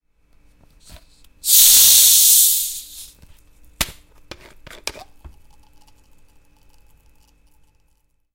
Coke bottle open
opening a plastic bottle of coca-cola (125 ml)
coke, kitchen, coca-cola, bottle, open